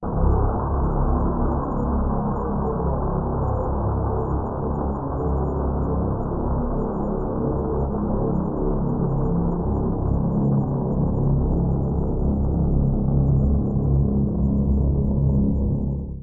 Bed of entanglement
While exploring an endless worm like hole, you hear the sound of disorientation
drone, mystery, bed, tone